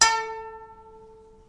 Plucking the A string on a violin.